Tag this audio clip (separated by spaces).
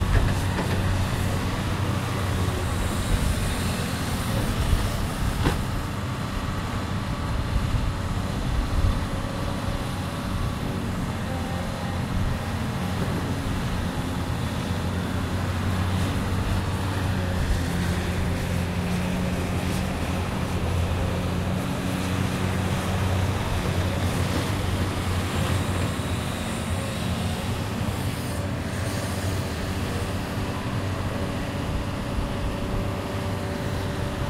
gears dig transport fieldwork loader volvo transportation